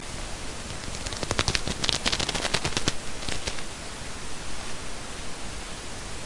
Just made some anime style fist clenching sounds cause I wasn't able to find it somewhere.